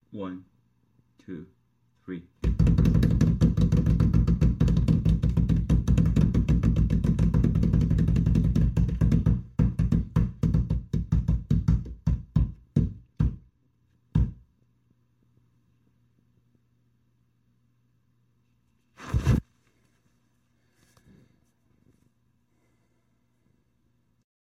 Erratic Beating
Raw recording of an adult male beating fists against wall as though trapped in a coffin. direction was to beat fists like in a panic then slow down and give up.
beating, irregular-beating, coffin, panicked-beating, beat-against-wall, beat-wall, erratic-beating, bassy-hitting, beat-coffin, trapped, erratic